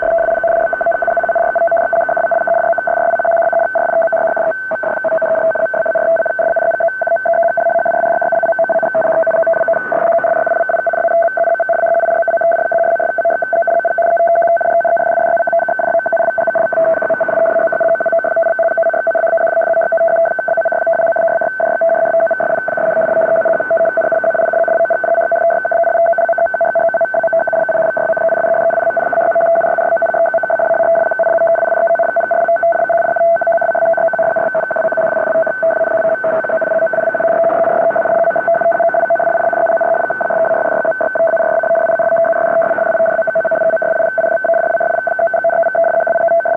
Various recordings of different data transmissions over shortwave or HF radio frequencies.
shortwave, radio, drone